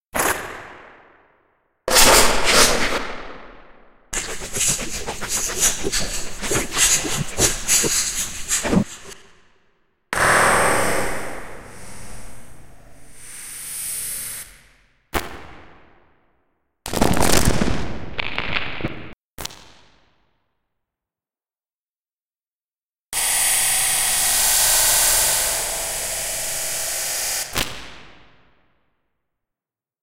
csound - convtest and pvoc
Testing csound's phase vocoder toolset on some field recordings. Part of a longer, less interesting program output. Overzealous use of convolution and time compression/expansion.